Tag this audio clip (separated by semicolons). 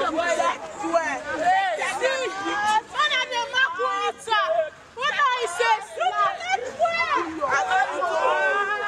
Nigeria; School; Street